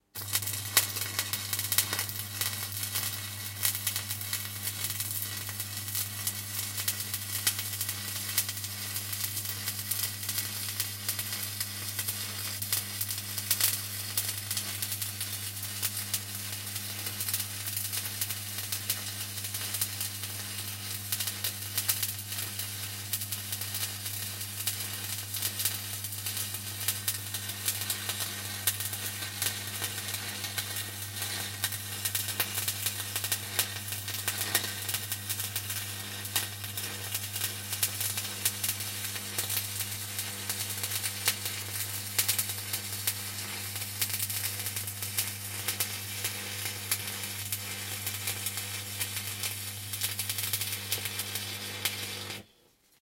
Welding 3 continious
Welding sounds made by welding with the electric current.
welder, jump, noise, electric, work, metal, spark, weld, welding, powerup, power, electrode